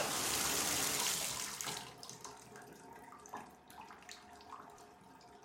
turning off tub
sound, class, intermediate